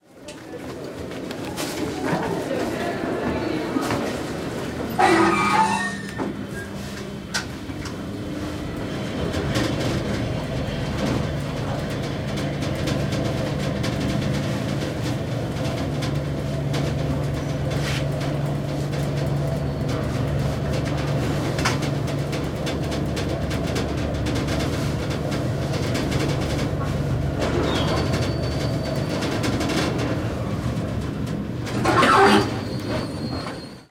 Elevator university1
Lomonosov Moscow State University
close, elevator, inside, machine, open, opening, russia